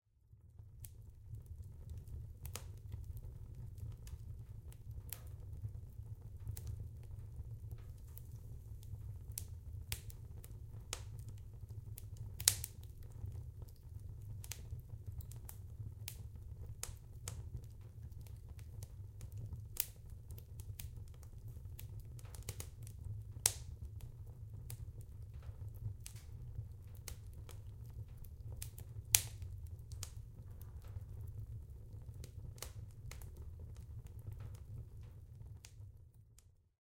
fire in the cabin woodstove
a recording from the sound library of Yellowstone national park provided by the National Park Service